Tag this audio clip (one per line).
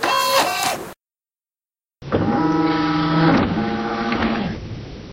burning-CDs
CD
CD-ROM
computer
computer-sounds
device
Linux
PC
Windows